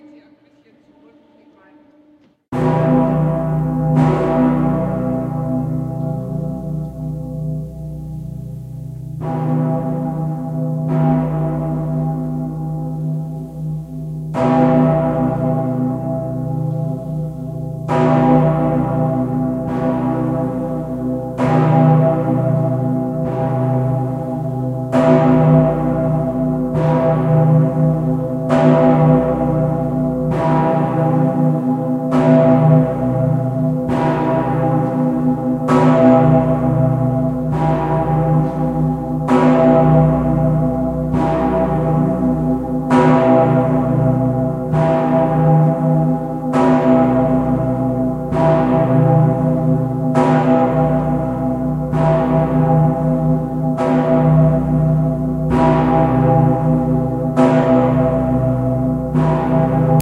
St. Petersglocke.
This is the largest bell of kolner dom, in germany,videotaped and edited to make it sound(record it the video myself with a blackberry phone!)
bell, cologne, glocke